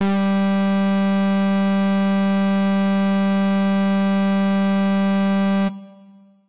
The note G in octave 3. An FM synth brass patch created in AudioSauna.